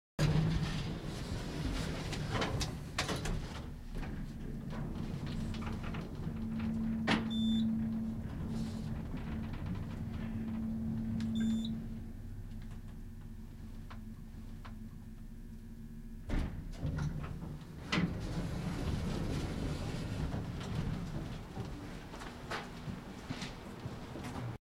elevator ride
inside elevator ambiance
elevator, ride